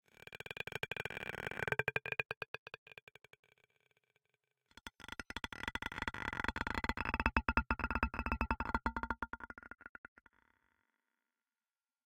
Electro stone 3
sfx, design, freaky, peb, UFO, Mechanical, Alien, fx, soundeffect, Futuristic-Machines, sound-design, Stone, sci-fi, abstract, Futuristic, strange, Spacecraft, electric, weird, future, loop, digital, sounddesign, effect, sound, Electronic, Noise, Space, lo-fi